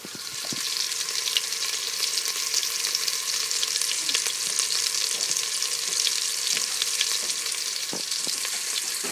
vlees bakken roomboter
Baking sliced meat in hot butter. Recorded with an iPhone 6.
baking, butter, cook, cooking, kitchen, meat